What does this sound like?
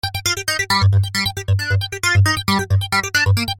guitar, bass, loops
guitar, loops